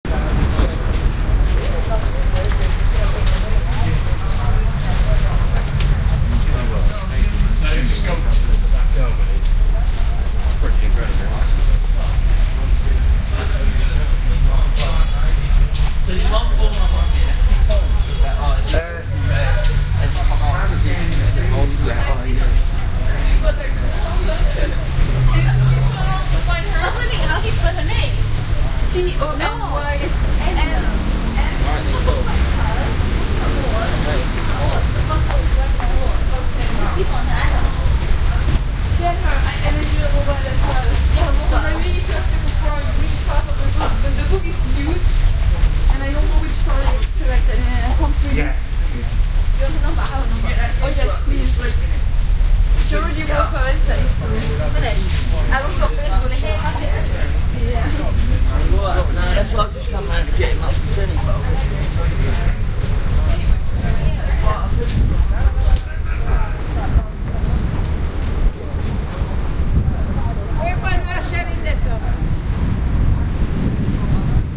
Elephant & Castle - Walking through underpass 2
ambiance, ambience, ambient, atmosphere, background-sound, city, field-recording, general-noise, london, soundscape